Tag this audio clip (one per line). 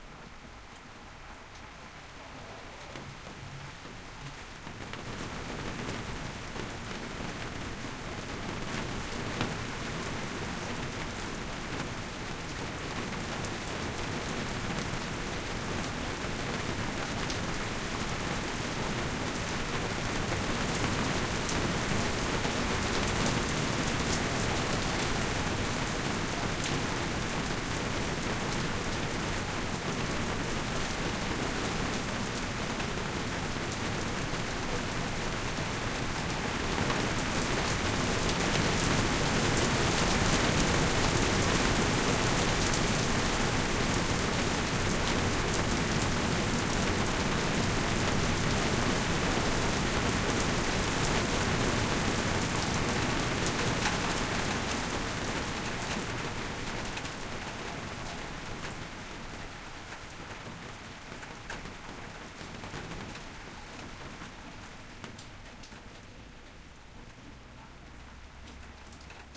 rain
rainy
water
weather